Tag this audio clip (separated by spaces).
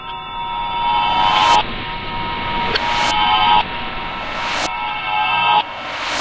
science-fiction special time transition travel